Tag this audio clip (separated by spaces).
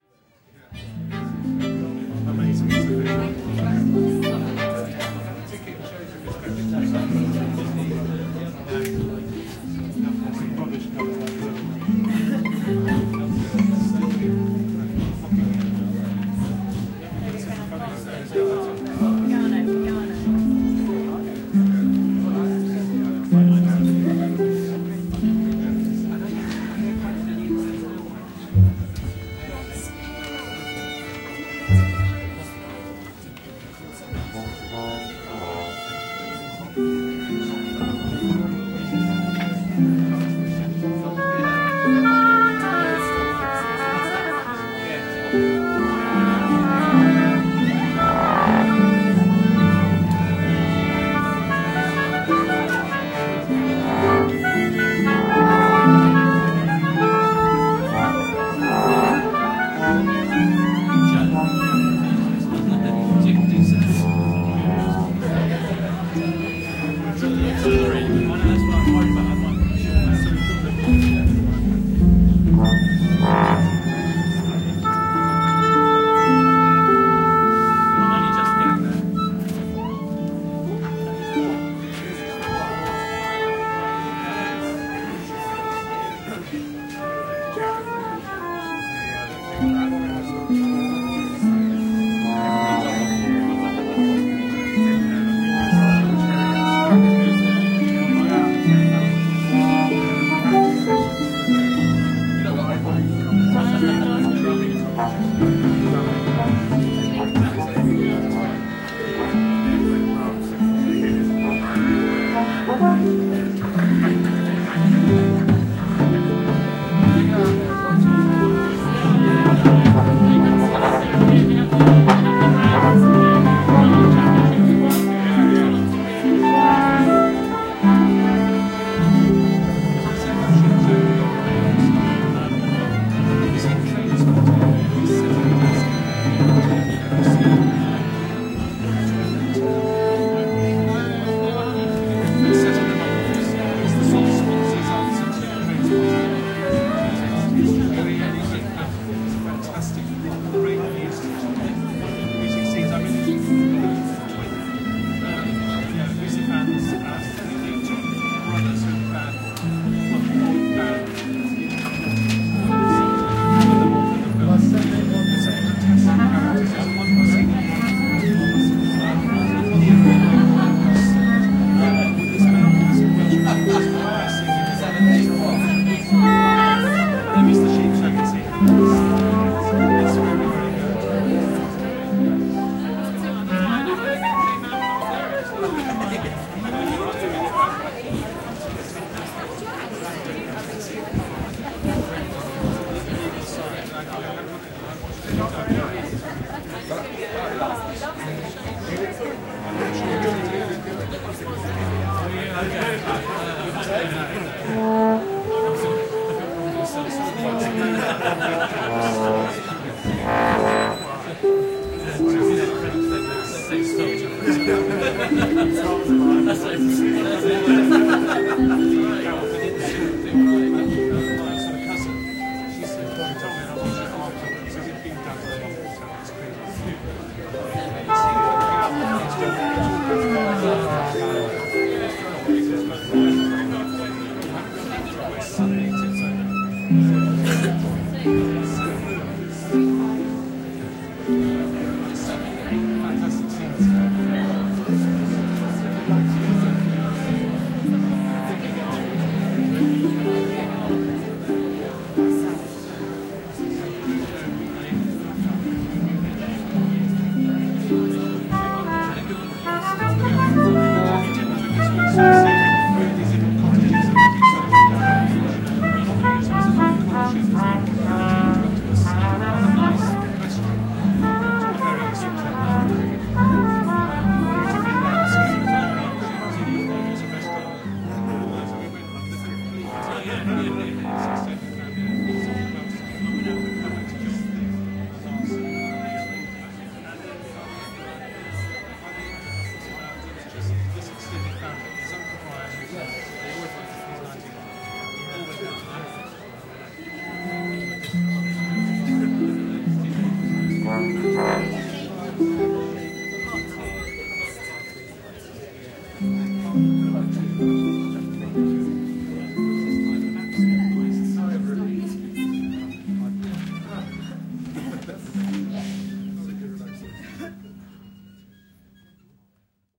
instruments locationsound orchestra theatre